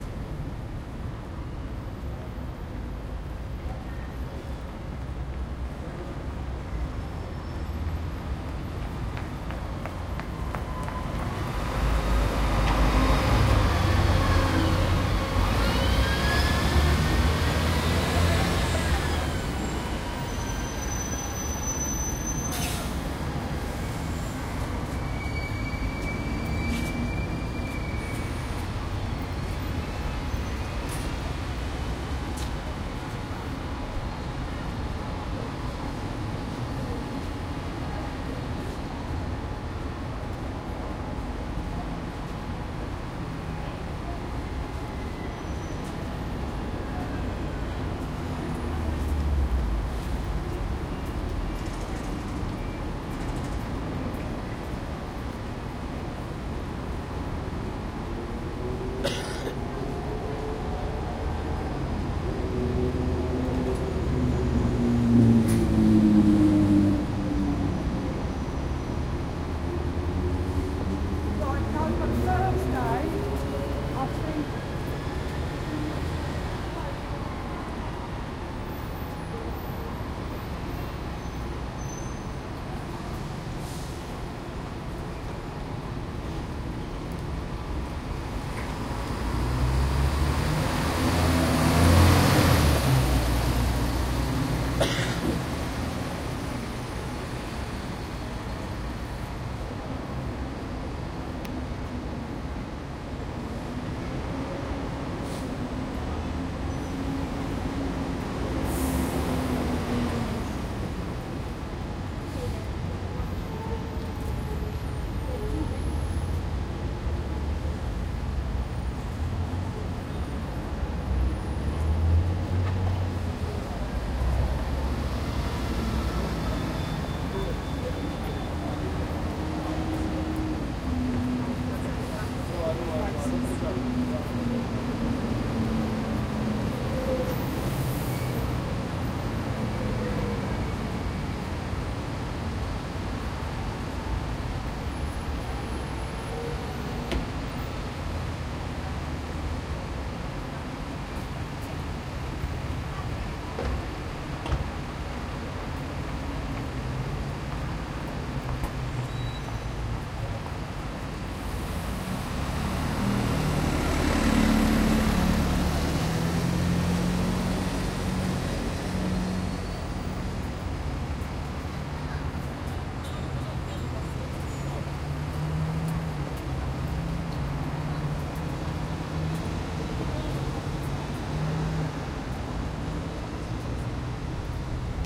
A recording at Vauxhall bus station in london